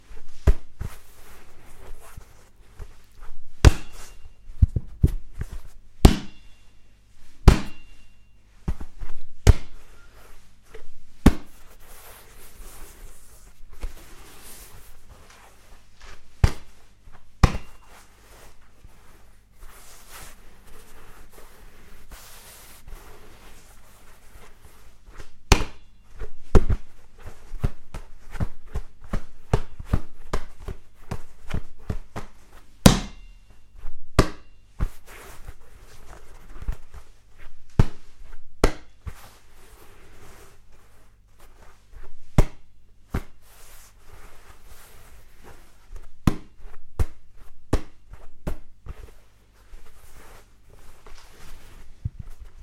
Basket Ball
basket, sport, basketball, ball